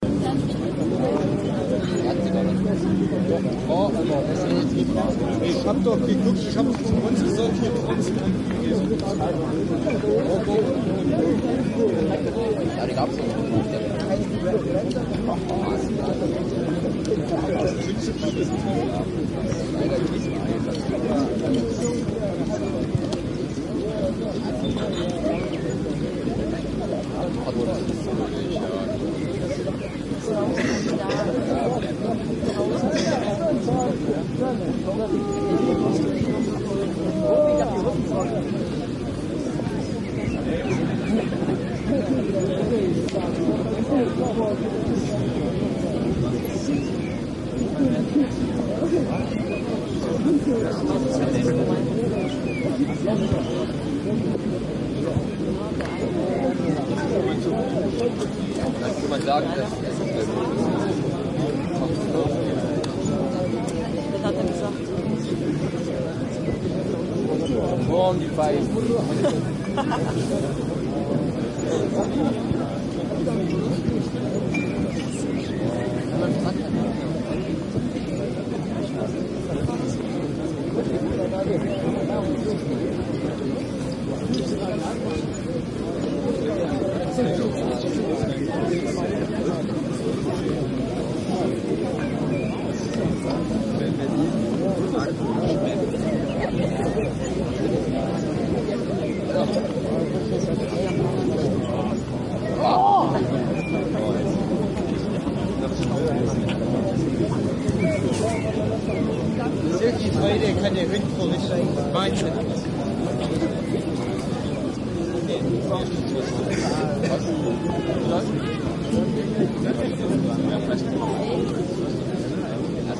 Field recording at Dresden, Germany
This is a field recording I made to test my new recorder. This was during my trip to Germany, in a Dresden park where everybody was lying on the grass, chattering, drinking and enjoying life.